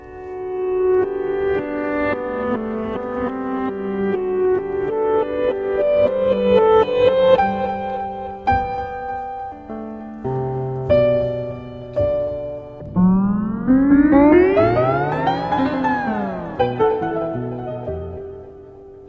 aria.remix
The opening part is taken from the end of the composition. Then four notes which are (IMO) central to this piece. It follows a fragment with pitch freely bent and an ending bar with fast tempo. The whole mix was finally added some echo.